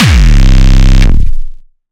Distorted kick I made via a synth generated a sub that was then ran thru FX.
Base note is C.